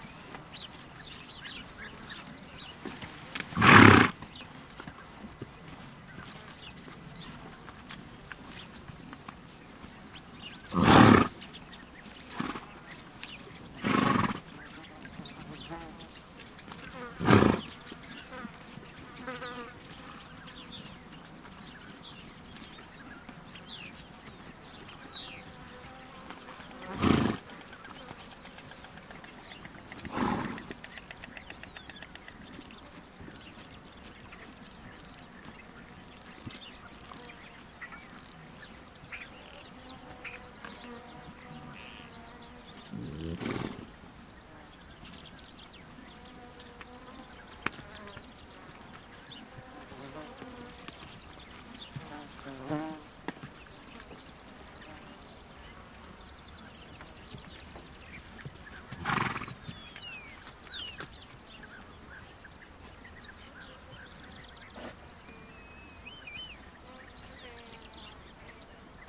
20060419.horse.snort
diverse sounds made by a horse while foraging, including several snorts. Birds in BG /sonidos varios hechos por un caballo mientras come, incluyendo varios resoplidos